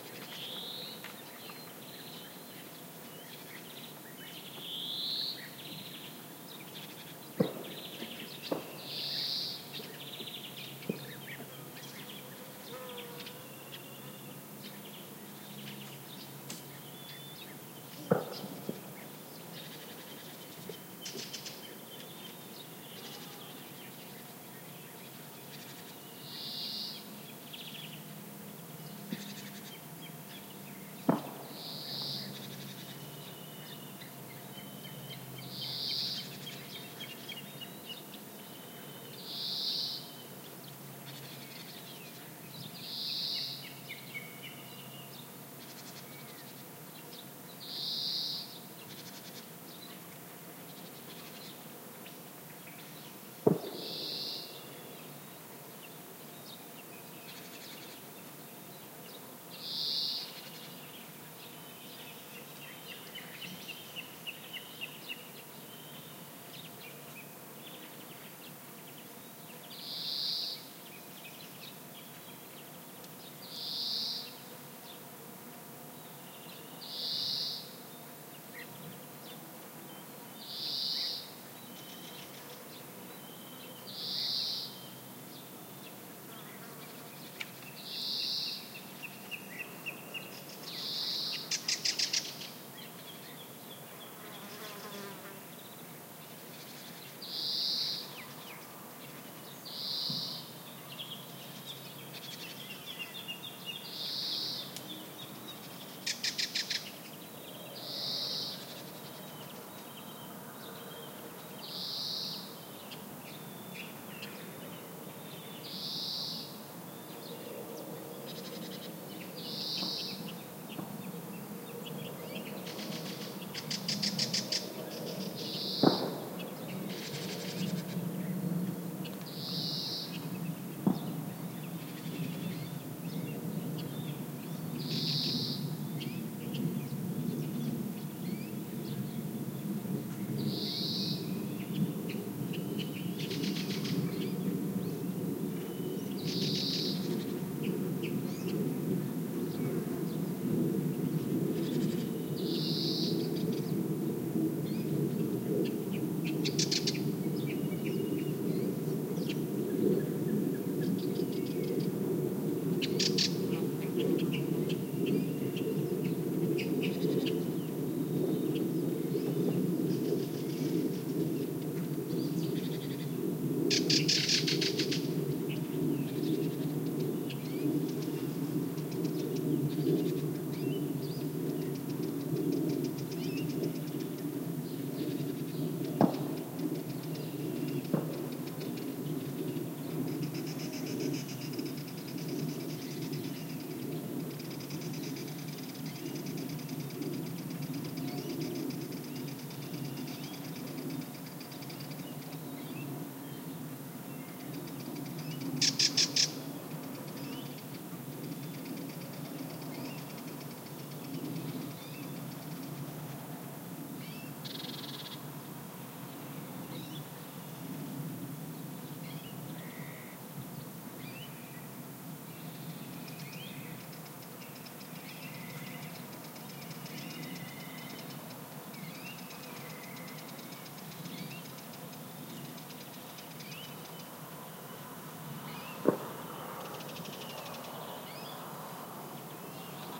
20070722.pinar 0900am
part of the '20070722.pine-woodland' pack that shows the changing nature of sound during a not-so-hot summer morning in Aznalcazar Nature Reserve, S Spain. Trailing numbers in the filename indicate the hour of recording. This particular sample includes bird calls (mostly warblers, jays and some Black Kite) a passing airplane, and distant gunshots. Except for a general buzzing in background (from flies flying high I guess) few insects near the mics. Some wind on trees... explore it yourself
ambiance, birds, donana, environmental-sounds-research, field-recording, forest, insects, nature, south-spain, summer, time-of-day